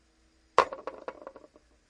when a jar is close to fall down